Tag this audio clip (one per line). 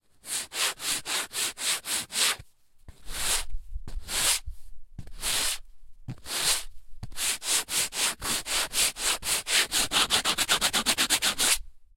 CZ
Czech
Pansk
Panska
paper
sand